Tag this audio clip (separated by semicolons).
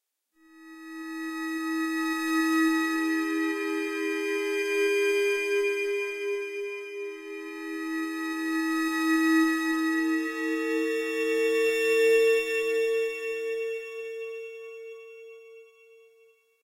ambience; ambient; atmosphere; background; background-sound; cinematic; dark; deep; drama; dramatic; drone; film; hollywood; horror; mood; movie; music; pad; scary; sci-fi; sfx; soundeffect; soundscape; space; spooky; suspense; thiller; thrill; trailer